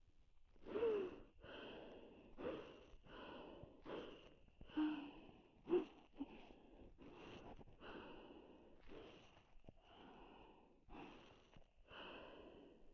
Contact microphone against a throat as female breathes.